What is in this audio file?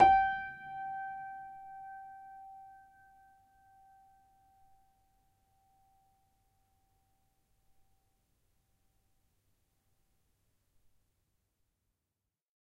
upright choiseul piano multisample recorded using zoom H4n
upright, multisample, piano, choiseul